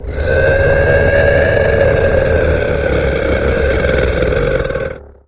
monster roar
roaring of a creature, sounds so angry.
creature, monster, roar, giant